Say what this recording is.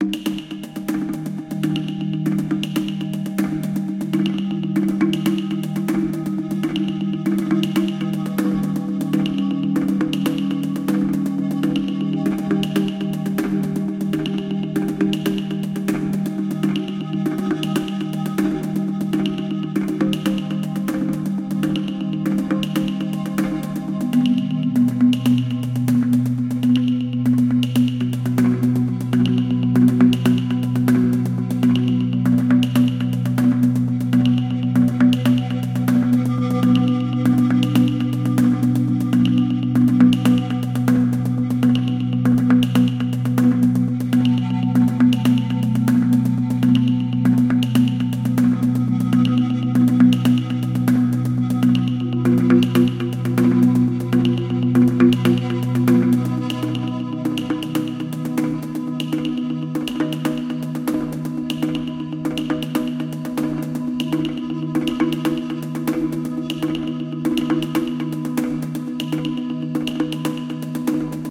Jungle tribal hut - Jungle Cinematic Tribal Drums Cajon Synth Atmo Music Background Drama
Tribal, Cajon, Ambient, Music, Cinematic